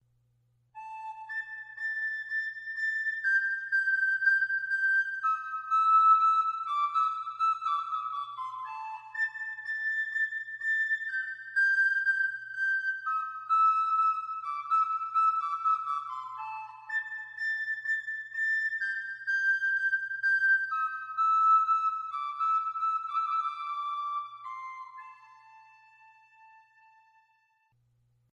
Fairy creepy sound
I made this by playing a piccolino recorder and then adding reverb to it. There was a loop ready-made in garage-band that I used as a model but then changed it because I didn't want it to loop. I added flourishes so that it ends. This is the intro for an audio book I am recording but since I created the sound, I can share it. I would be happy if anyone else wanted to use it.
creepy, curious, eerie, Fairy, haunted, spooky, weird, wondering